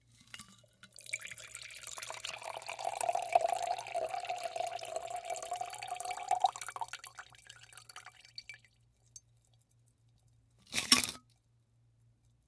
Continuous pour into empty glass, ice hitting metal